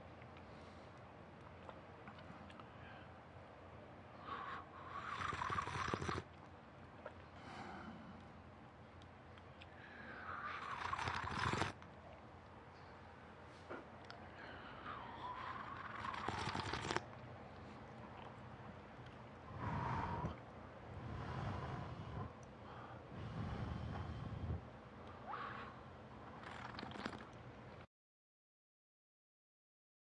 Slurp Tea
Sipping and slurping tea from a mug, blowing on it to cool down. Recorded with a Sony PCMD-100.